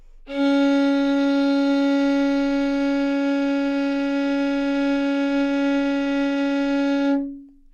Part of the Good-sounds dataset of monophonic instrumental sounds.
instrument::violin
note::Csharp
octave::4
midi note::49
good-sounds-id::1449